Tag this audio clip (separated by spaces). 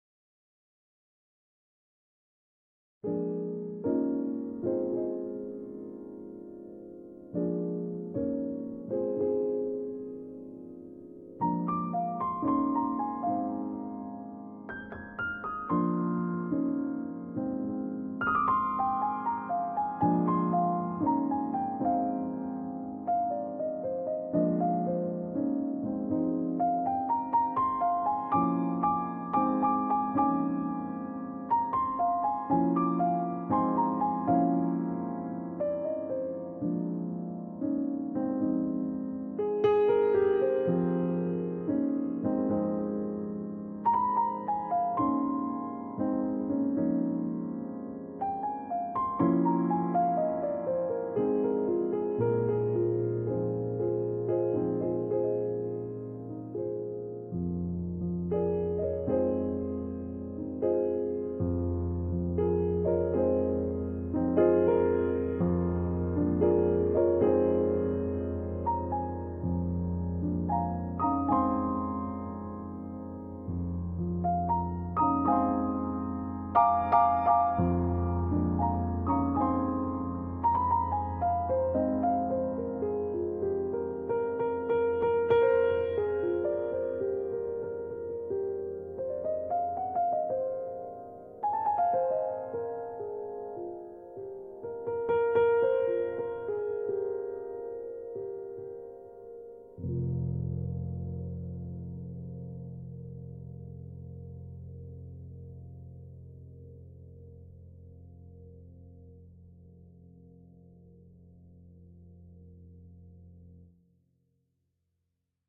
Ambiance Piano Soundtrack